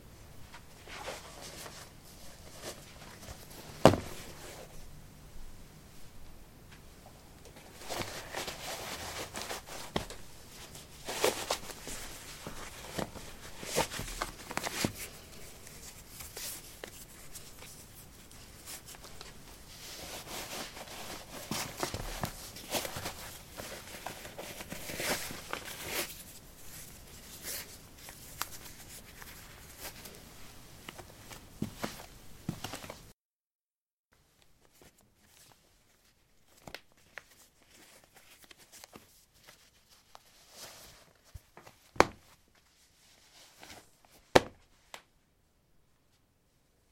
soil 11d sneakers onoff
Taking sneakers on/off on soil. Recorded with a ZOOM H2 in a basement of a house: a wooden container placed on a carpet filled with soil. Normalized with Audacity.
footsteps, steps, step, footstep